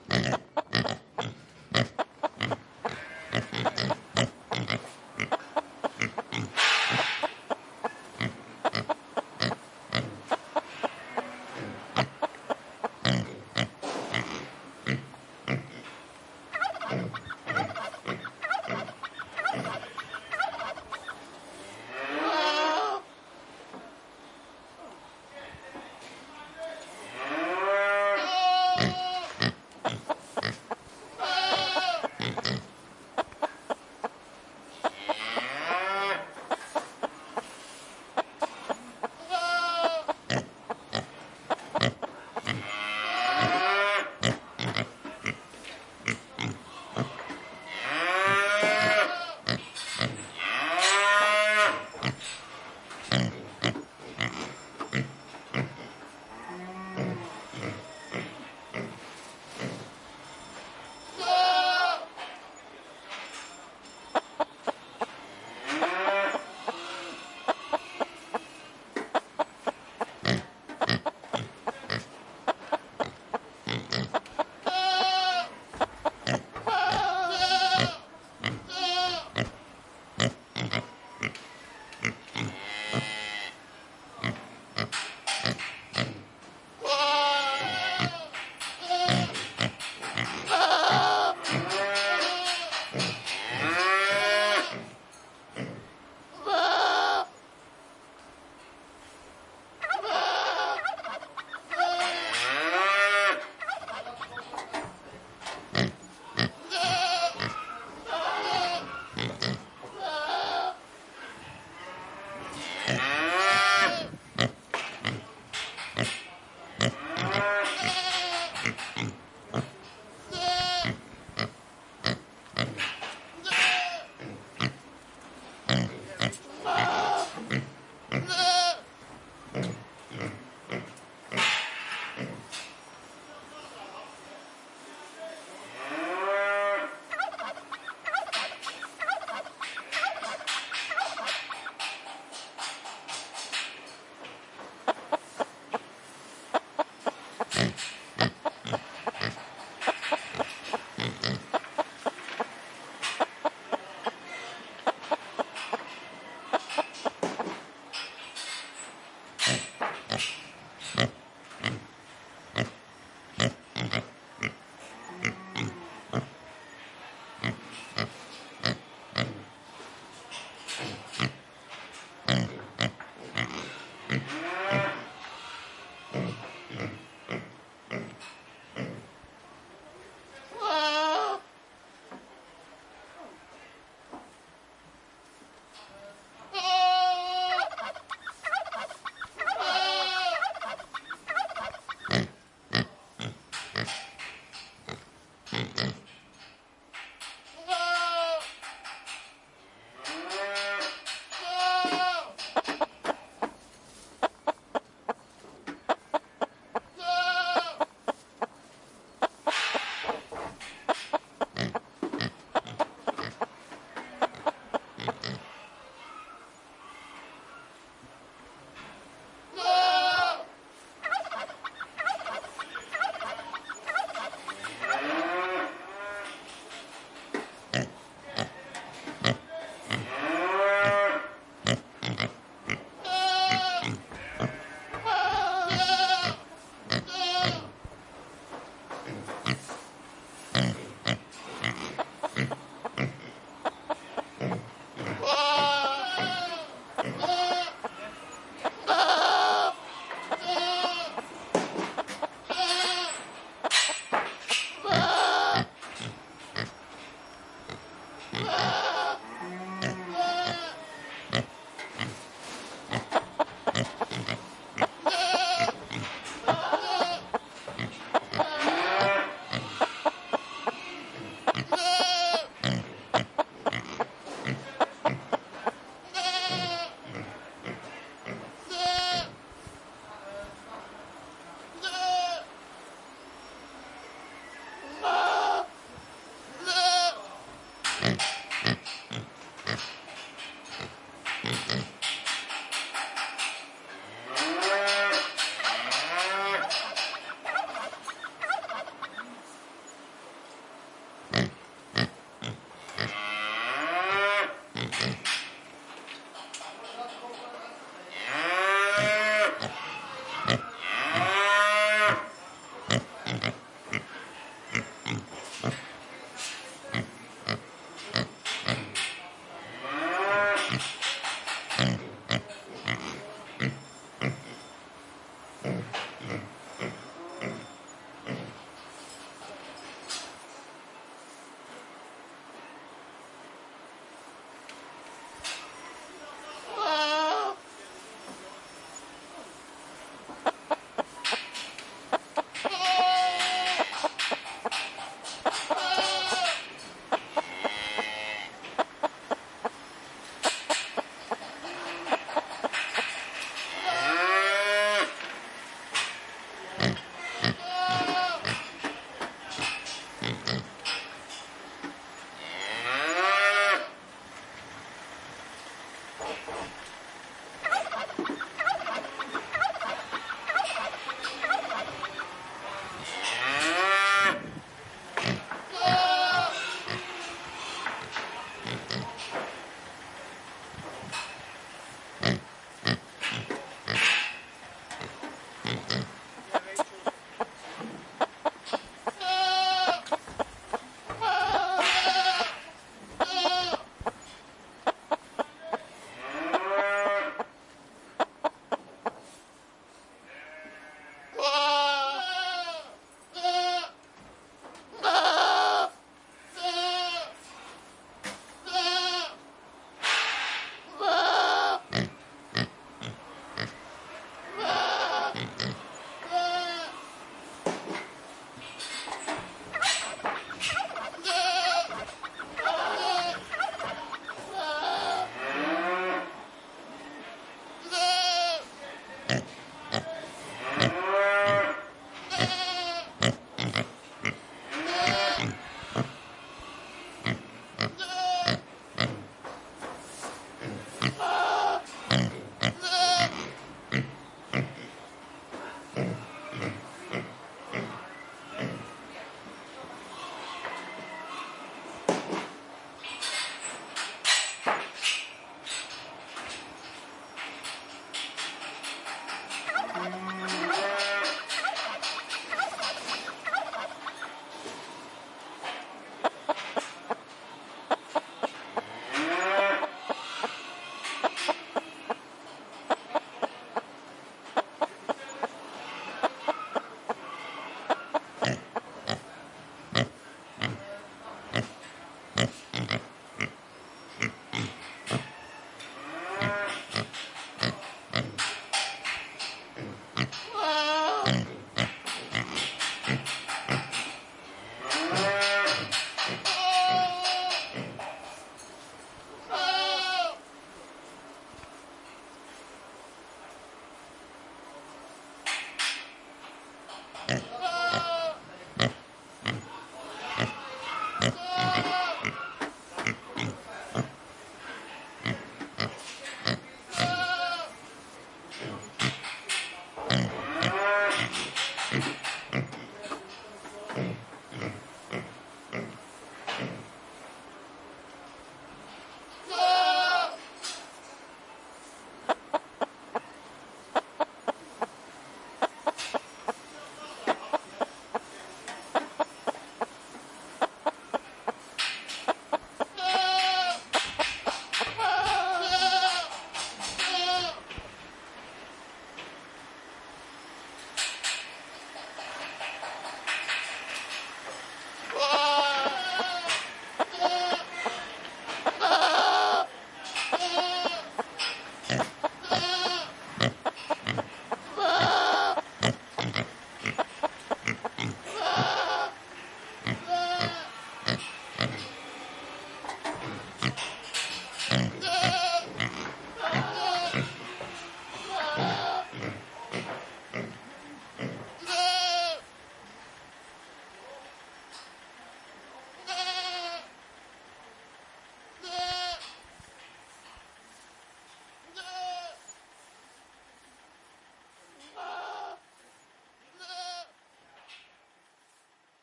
There is a little light EQ and compression on the master bus, and some volume automation to help it feel more “random”, otherwise the only changes to the original clips are fade ins/out and some panning.
There are a few small peaks.